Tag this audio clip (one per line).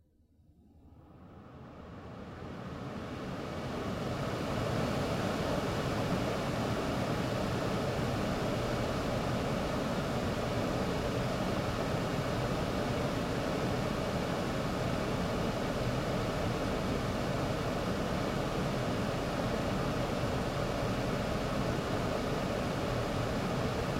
fan
conditioning
car
air-conditioning
hum
vent
Audio
conditioner
air
ventilator
ventilation